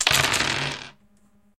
Rolling lots of dice (D&D)
Rolling a whole bunch of dice, as though in a game of Dungeons & Dragons.
Created for the visual novel, "My Poison Summer."
rolling, DM, role-play, dice, D20, play, DND, player, rolls, roll, RPG, dungeons-and-dragons, die, roleplay